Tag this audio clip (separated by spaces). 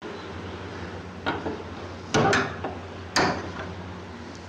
closing door folly lock locking